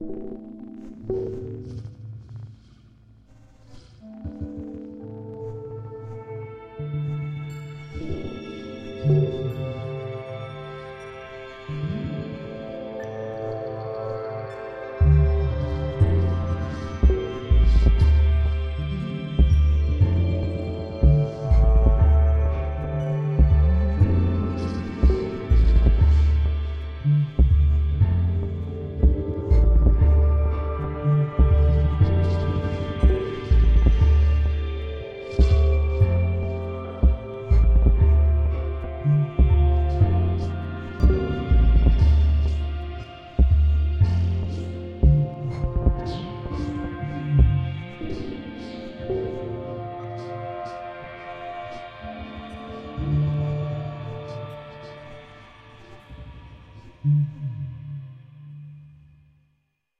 MS-BonPastor norm
Original Soundtrack composed & produced by Sara Fontán & Aalbers recreating a musical soundscape for the neighborhood of Bon Pastor from Barcelona.
Music, OST, Calidoscopi19, SaraFontan, BonPastor, Aalbers, Soundscapes